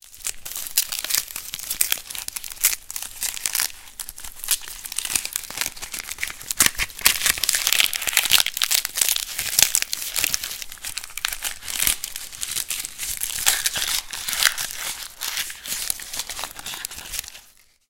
Rolling and twisting a big onion in my hand. The thin layered skin produces paper-like sounds. It has an abstract quality, like bustling of insects. It is perfect as a source for further processing and sculpting.